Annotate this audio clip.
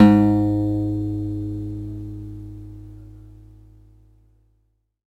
Sampling of my electro acoustic guitar Sherwood SH887 three octaves and five velocity levels
multisample, acoustic